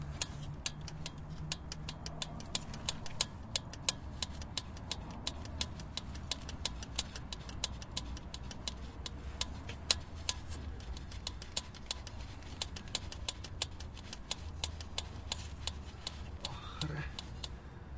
Sound of manual use fuel pump. Old russian car Moskvich-412.
Recorded: 2012-10-25.